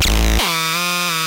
Pelican Hurt His Toe
All sounds in this pack were made using a hand soldered synthesiser built in a workshop called DIRTY ELECTRONICS. The sounds are named as they are because there are 98 of them. They are all electronic, so sorry if "Budgie Flying Into The Sun" wasn't what you thought it was.
Make use of these sounds how you please, drop me message if you found any particularly useful and want to share what you created.
Enjoy.
16-bit, synth, Frequency, chip, robotic, Beeping, circuitry, robot, 8bit, 16bit, 8-bit, game, synthesiser, computer, FM, Digital, Modulation, electronic, synthesizer, Beep